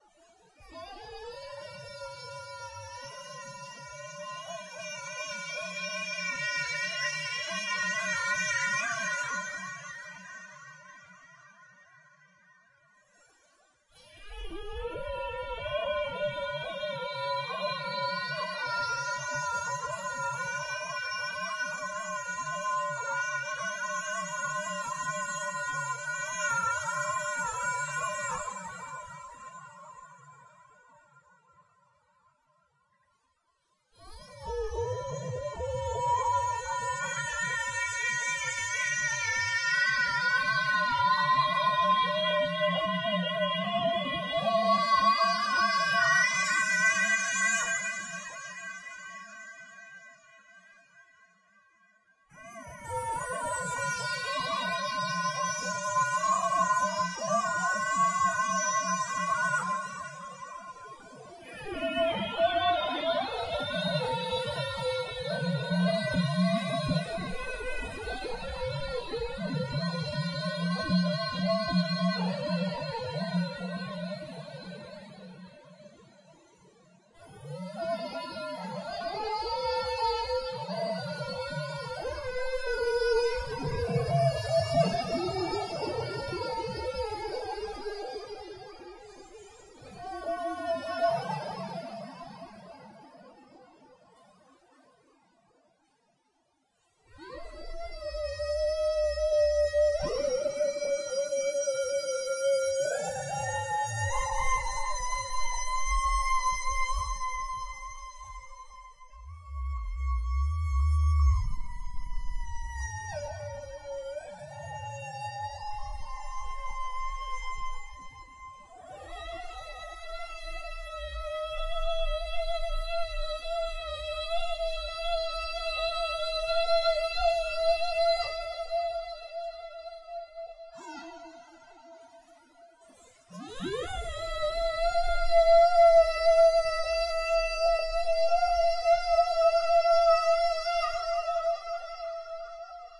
strange sound design, high pitched voice. First step of processing of the bunker bar sample in Ableton. Make it up to 32 semi-tons (like 16 octaves), added Ableton's reverb and frequency shifter.